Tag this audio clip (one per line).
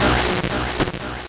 cab
dramatic
guitar
amp
rock
noise
distorted
echo